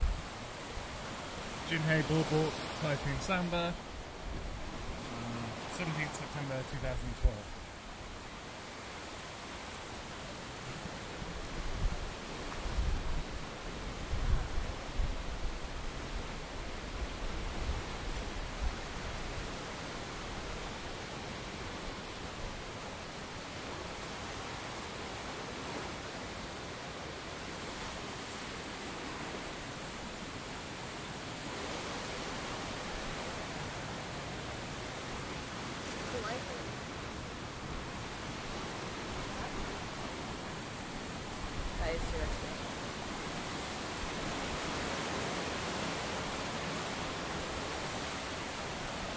A recording in stereo of the sea being lapped by Typhoon Samba (100mph+ winds)
Asia, ocean, typhoon, sea, seaside, Korea, field-recording, wind